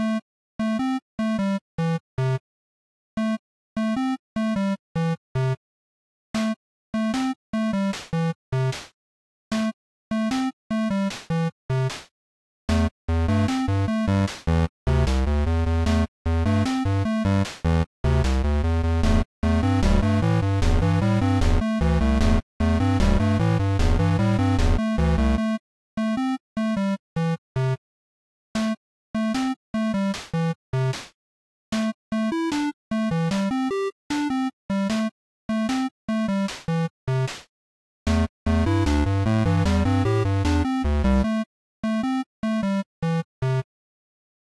Pixel Song #9
Loop, Music, Pixel